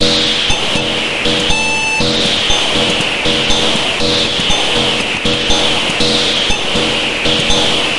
Aerobic Loop -35
A four bar four on the floor electronic drumloop at 120 BPM created with the Aerobic ensemble within Reaktor 5 from Native Instruments. Very weird and noisy electro loop. Normalised and mastered using several plugins within Cubase SX.
drumloop, rhythmic, 120bpm